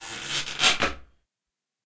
Strain on an inflated balloon. Recorded with Zoom H4